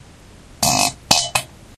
fart poot gas flatulence flatulation explosion noise